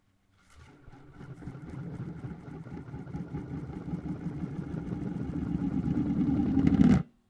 Rolling Tub 01 - Closed
1. Roll a small plastic tub (don't let it run, let it swing in its place)
2. Hit record
Here you go :)
This one with open side down